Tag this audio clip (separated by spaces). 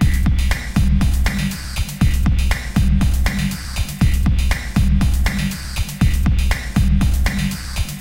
ableton battery